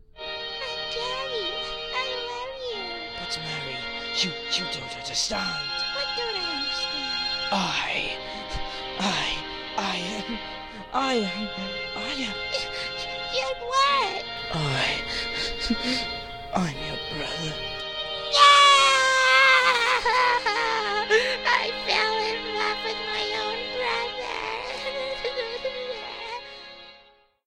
A drama so bad and cheesy, it's funny. The music is really the only sad part, and even then... not so much.
In this "drama" that was "sooooo touching", Mary (the squeaky annoying girl) finds out that Johnny (the deep voiced so quiet I had to amplify) guy was her brother. Oh no, it's sooo sad, I need a Kleenex. Waah-haah-hah.
This drama sucked. Intentionally. I don't want to make a real drama. (I probably couldn't if I tried.)
This sound was made with a headset mic and GarageBand (the violin part).
If you need the script:
Mary: But Johnny, I love you!
Johnny: But Mary, you don't understand!
Mary: What don't I understand?
Johnny: I-- I am--...
Mary: You're what?
Johnny: I... I am your brother.
Mary: NO! I fell in love with my own brother. *cries*
[recording ends]